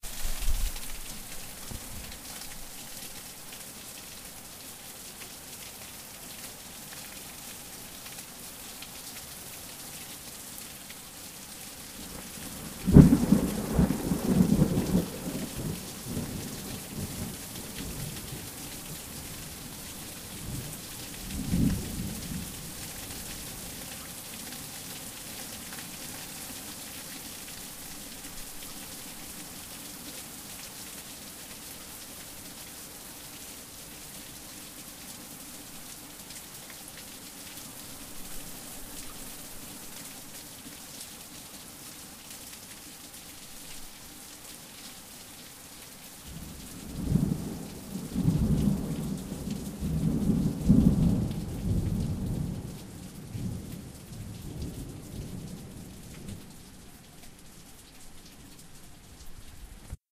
Heavy Rain with thunder.Distant Thunder, light wind. Really good thunder. Rain tapers near end.